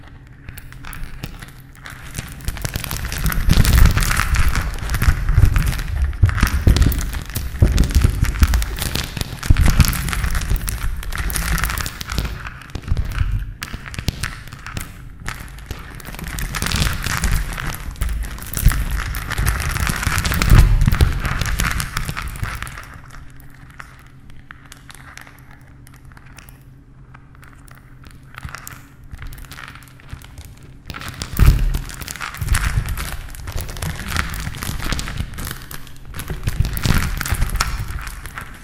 Rocks Crumbling from room or cave
This is a sound to emulate cracks on a wall as they happen or rocks falling off a cave or earthquake aftermath.
Simply by holding my Olympus ws600 against a lamp with beeds and crumbling hard plastic on the other then I uploaded the file to audicity and added the Gverb effect to make it sound from a short distance and converted the file to mono as well :)